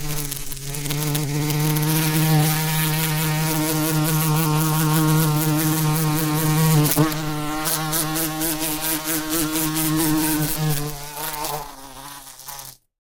A large bumblebee recorded using a Sony PCM D50 with the built-in mics.
Bumble-bee, insect, Bee